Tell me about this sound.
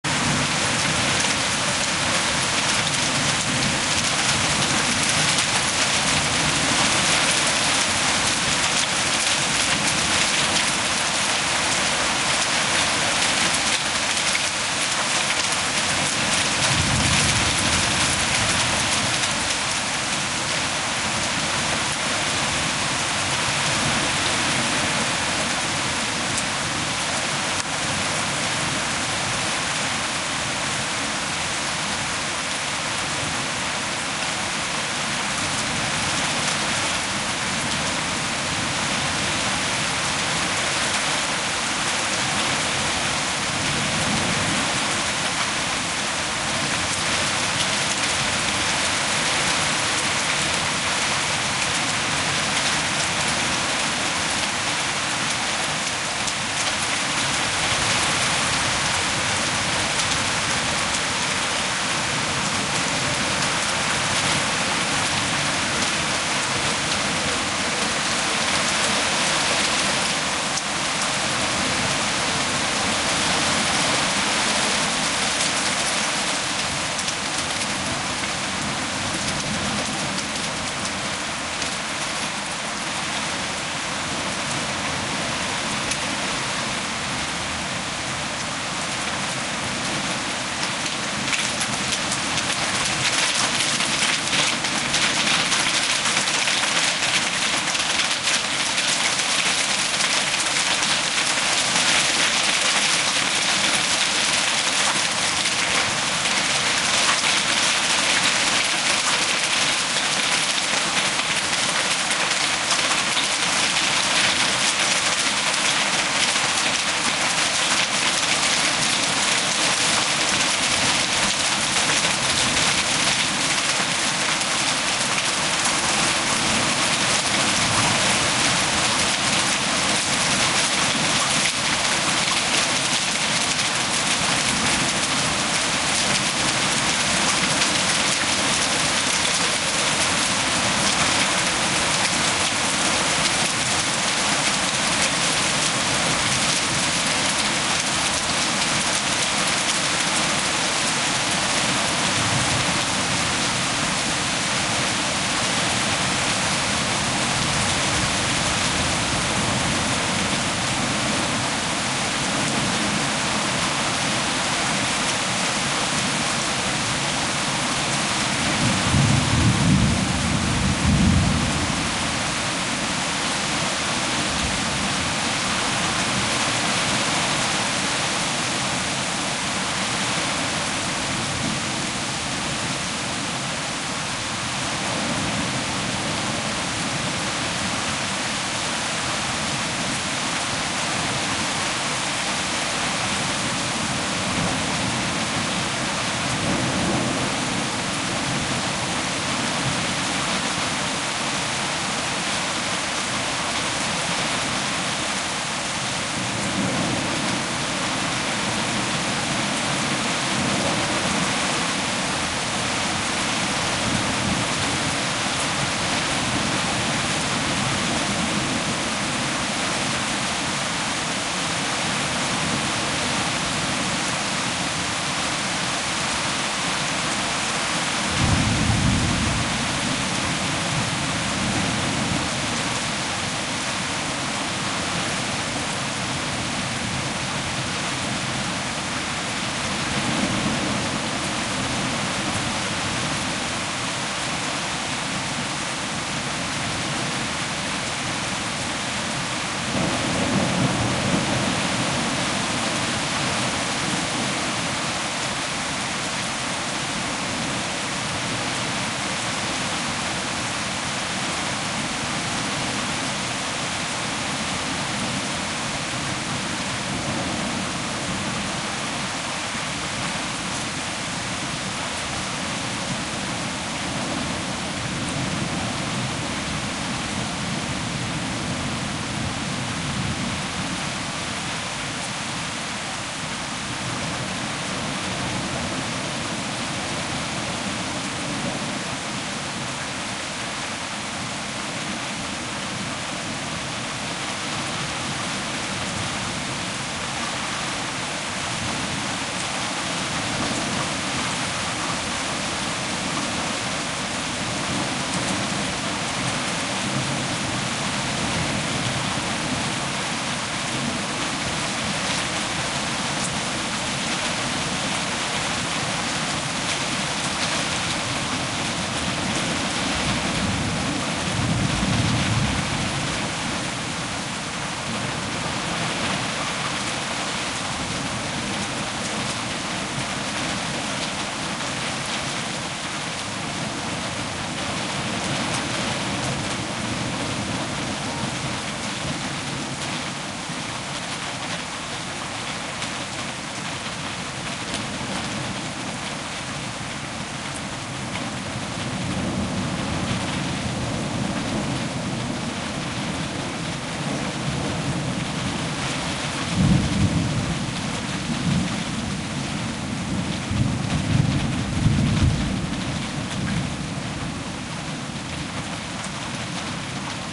powerful rain, thunder and hailstorm
i recorded in Germany 2013.
A powerful storm including rain, thunder, hailstorm
deutschland, germany, gewitter, hagel, Hagelsturm, hailstorm, nature, rain, regen, relax, relaxation, sleep, sound, thunder, thunderstorm, weather